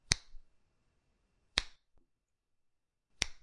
Three takes of me snapping. Recorded with Zoom H1n.
finger-snap, snap, snapping